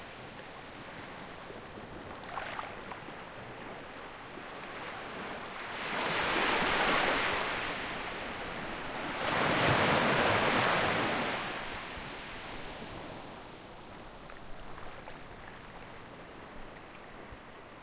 Audio captured on the island of Superagui, coast of the state of Paraná, southern region of Brazil, in March 17, 2018 at night, with Zoom H6 recorder.
Small waves. Light wind.
sea, waves, seaside, ocean, beach, coast, surf